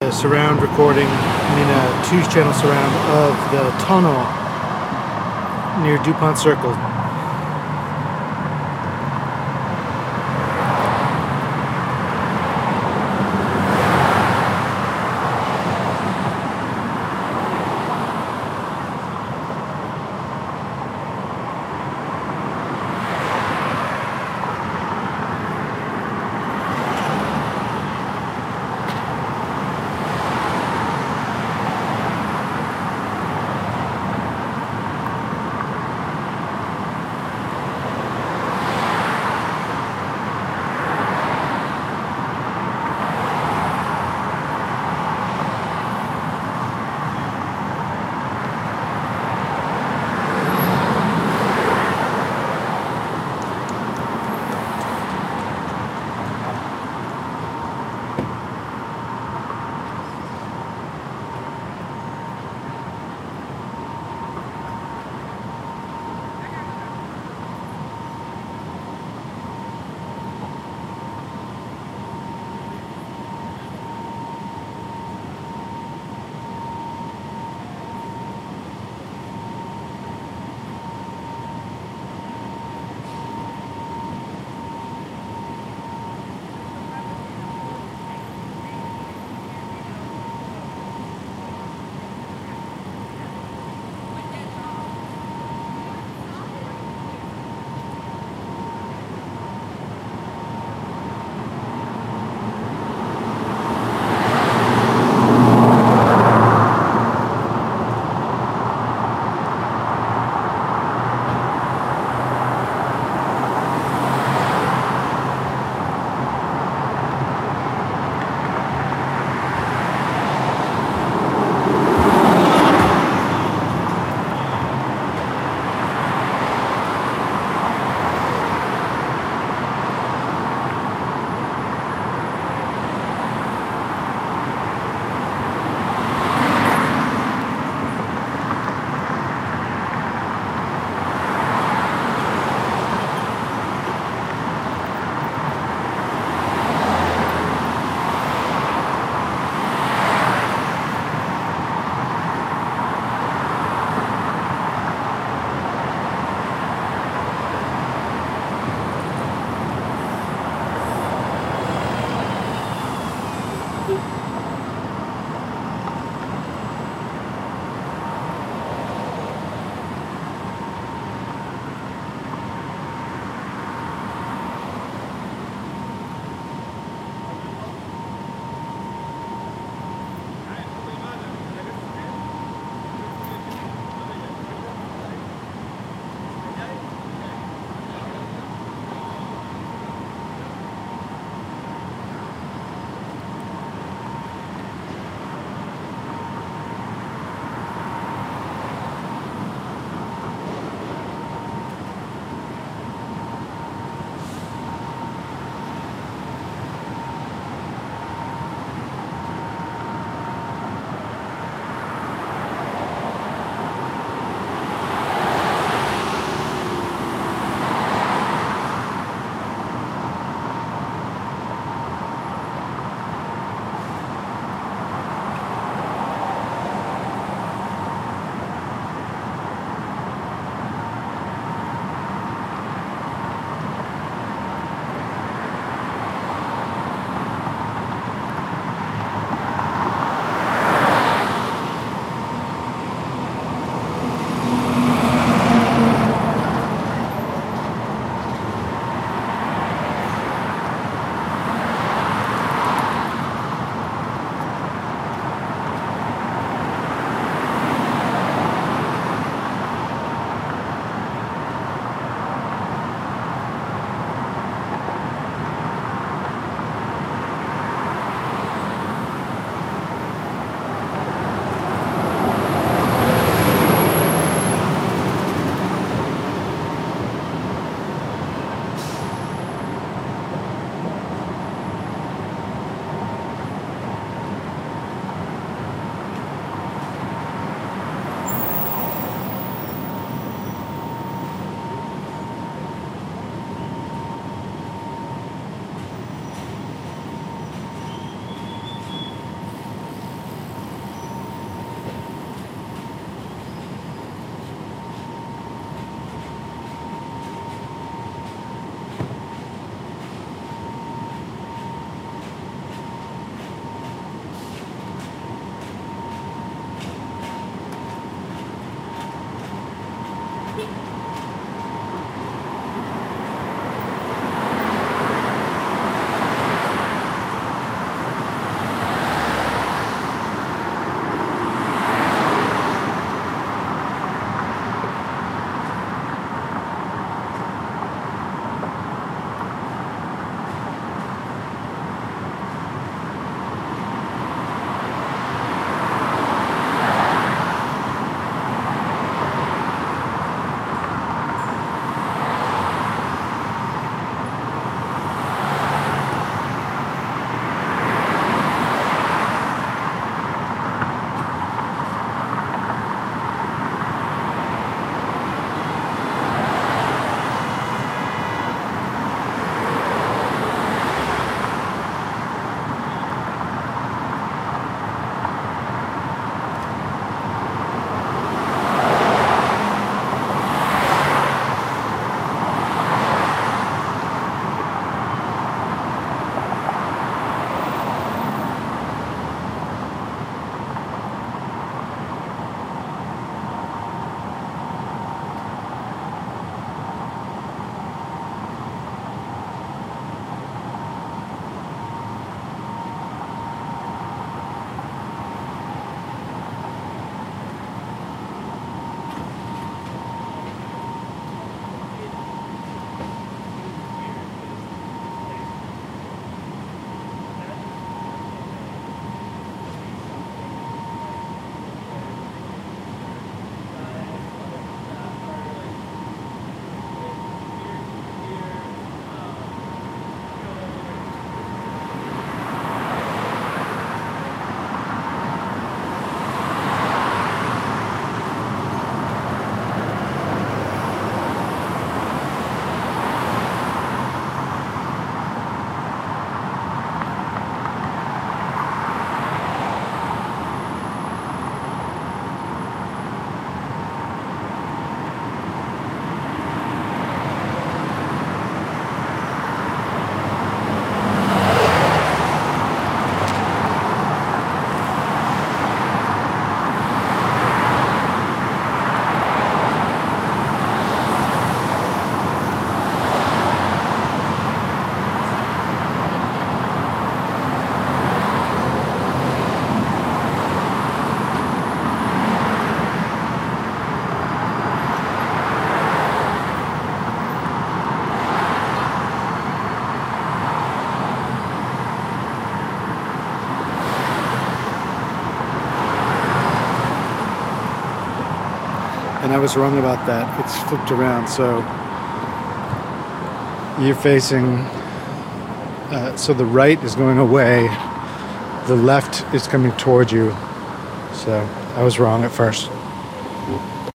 DC TUNNEL
Traffic tunnel in Washington, DC.
traffic, ambience, tunnel, street, cars, noise, dc, field-recording, city